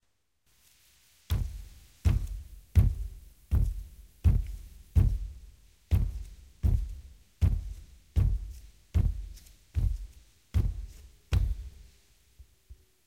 pasos gruesos
dar pasos en medias en el vidrio de relieve
vidrio, medias